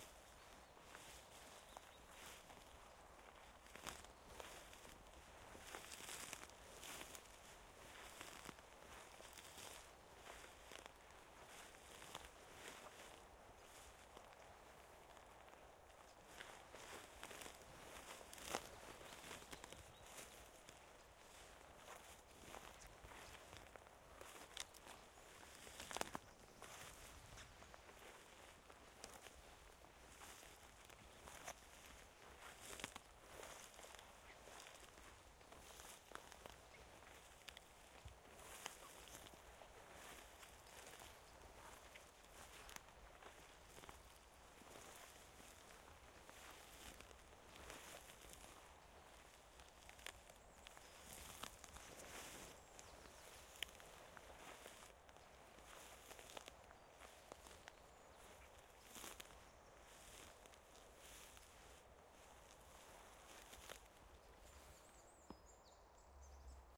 One person's footsteps walking through the forest undergrowth
Sound of a person walking through forest undergrowth, 'white noise' of high tree branches swaying in the wind can be heard
Recorded on a stereo Audio Technica BP4025 into a Zoom F8 Mixer